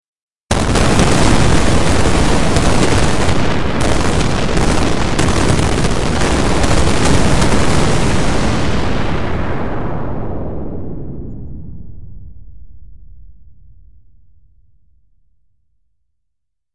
spaceship explosion6
made with vst intrument albino